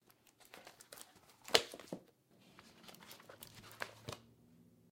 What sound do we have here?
Putting a Large Book Down
Placing an 8"x12" hardcover notebook down on a wooden table surface. Recorded from 6 inches
Book, Drop, Notebook, Paper, Place, Put, Table